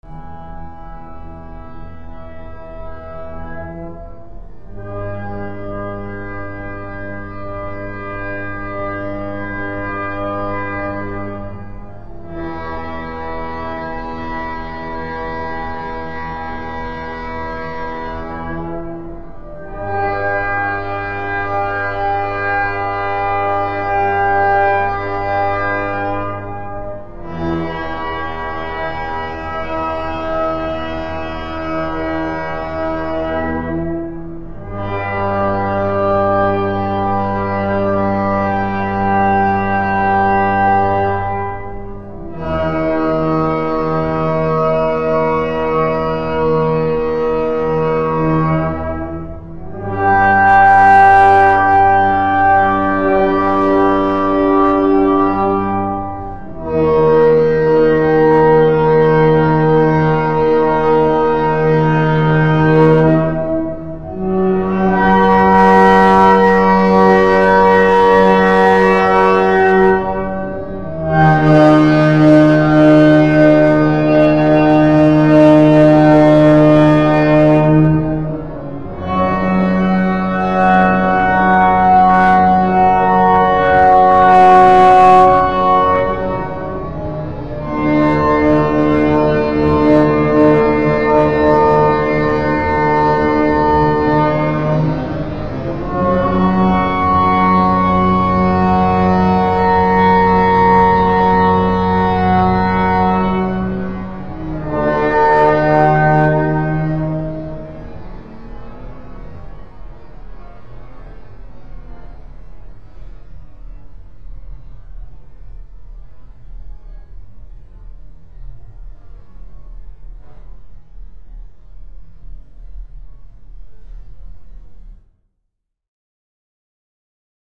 Beware! The heavenly horns are sounding! All those hear should have fear!
Created using a European Police Siren sound clip from the Mixcraft 5 library. Created on 9/10/16 Mixcraft 5 was also used to slow the sounds and alter the pitch and reverb.
heavenly, horns, apocalypse